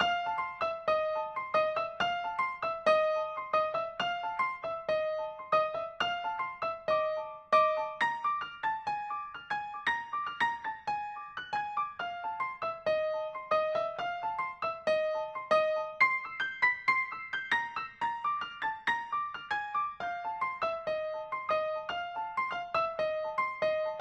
Song4 PIANO Fa 4:4 120bpms
beat, 120, loop, HearHear, bpm, Fa, Piano, Chord, blues, rythm